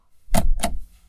Wiper Switch Single
Mazda MX5 (Miata) Single use window wiper button